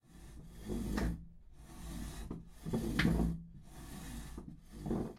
big object being dragged